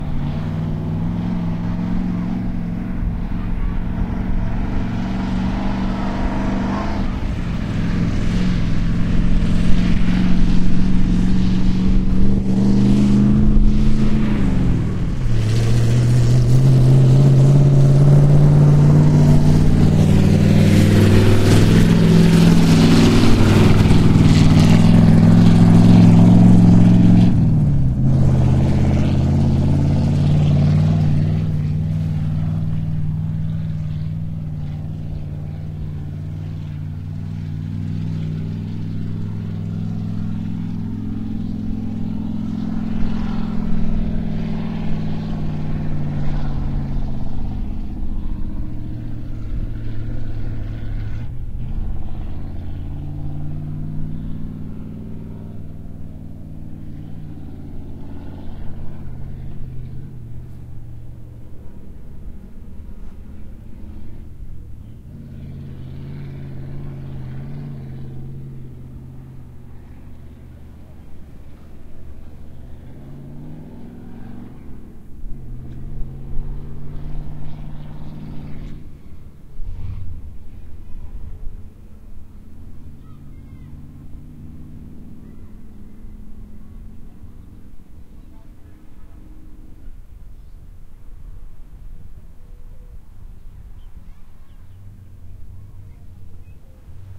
dune buggy muscle car pass slow loud nearby full engine rev and trail off to right
dune, nearby, car, loud, engine, pass, rev, slow, muscle, buggy